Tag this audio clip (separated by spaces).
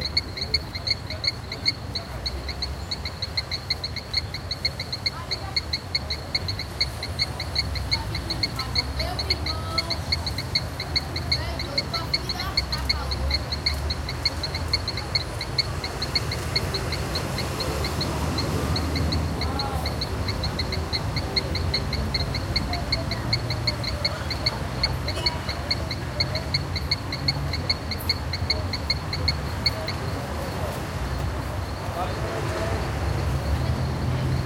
ambience field-recording grigs grilos neighborhood people soundscape